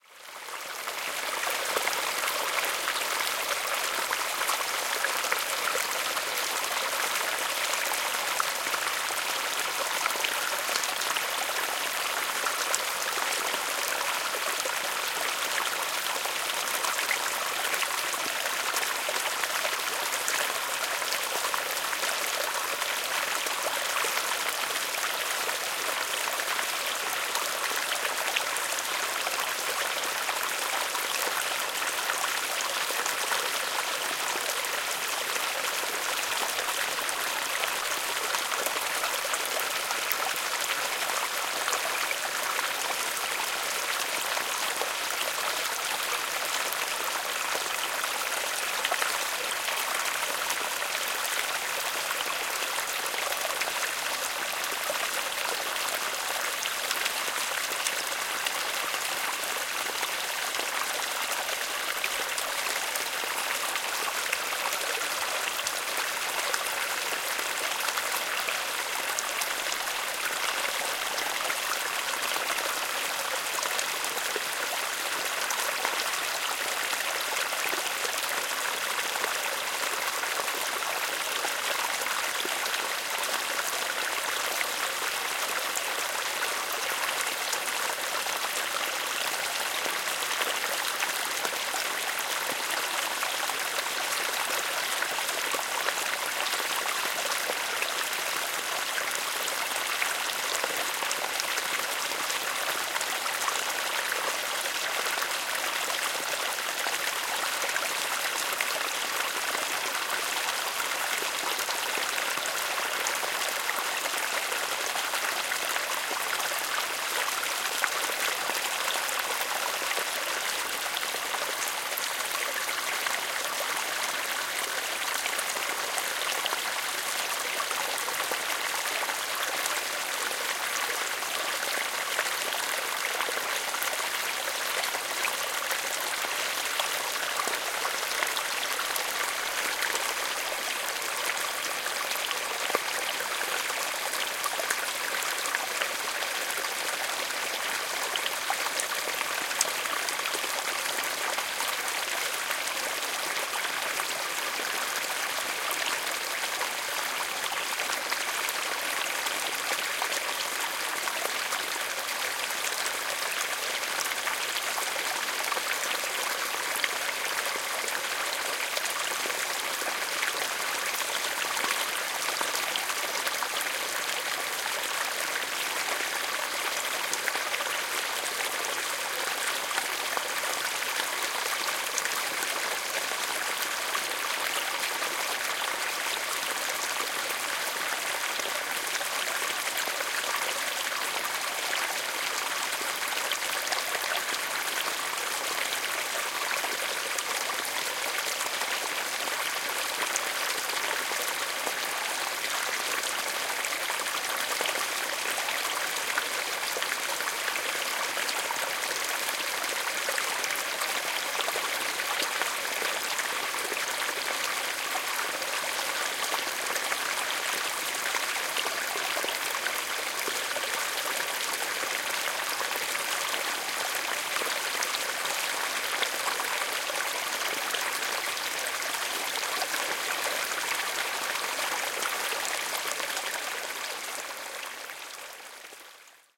Stream in a dirt road
Another small stream at night in the interior of Minas Gerais, Brazil.
ambient, bird, birds, brazil, cachoeiras, countryside, field-recording, forest, minas-gerais, morning, nature, rain, rio-acima, river, waterfall